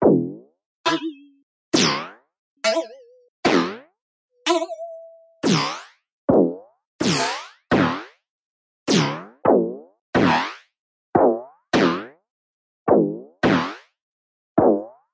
Different Boing Sounds designed with the Ableton Operator. Everything is synthesized, no samples used.
Boing, design, effect, fx, game-sound, metalspring, sfx, sound, sound-design, spring